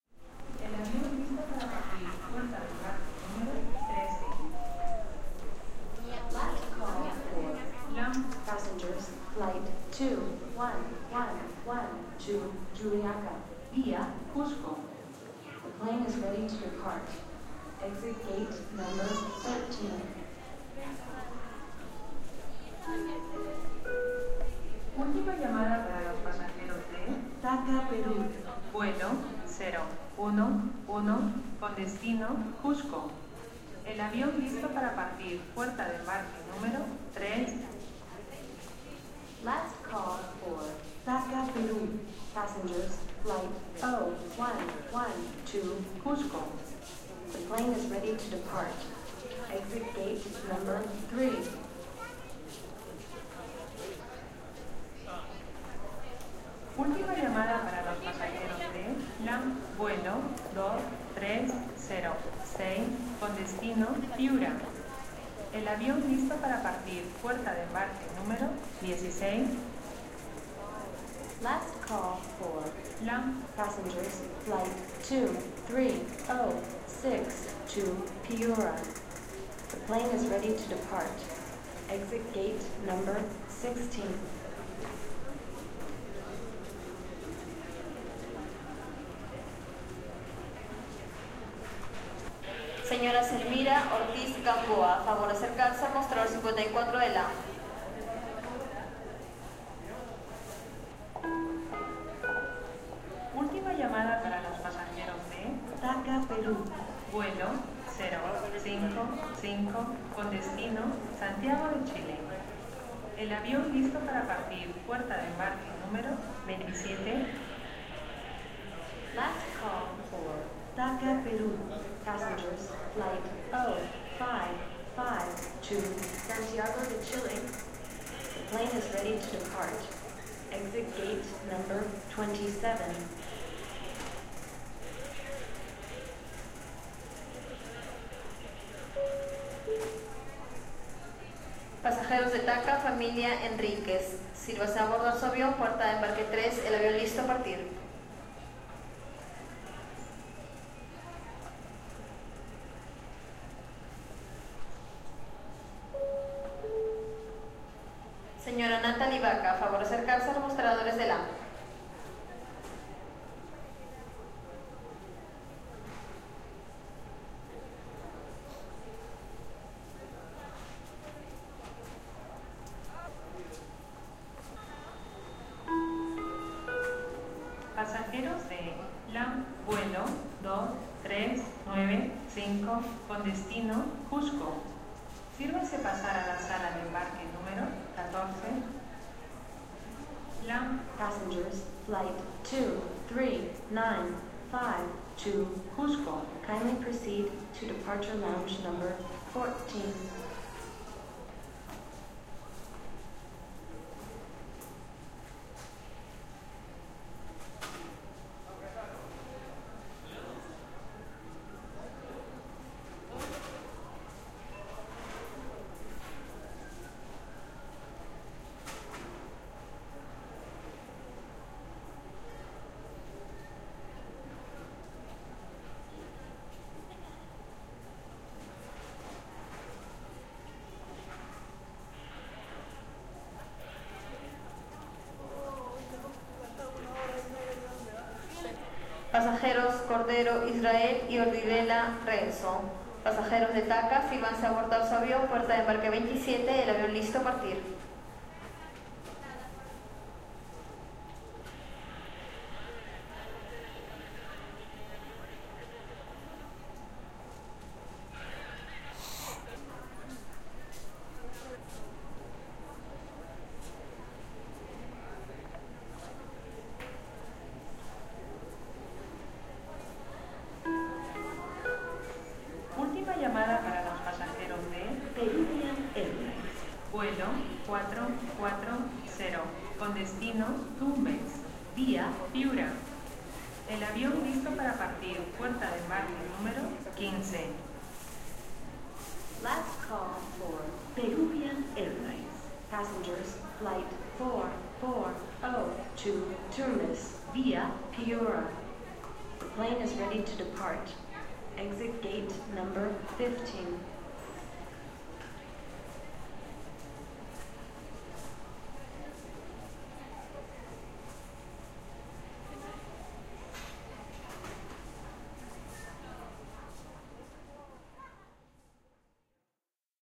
A few minutes at the boarding hall of Lima´s Jorge Chavez International Airport. Recorded with a Tascam DR 7.